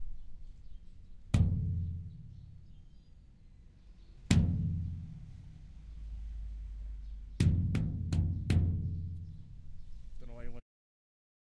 Eco Metal Plate150091
Eco Metal Plate
DVD, Radio, alien-sound-effects, pod-Cast, owi, Sound-Effects, effects, Future, Futuristic, stolting-media-group, TV, Film, fx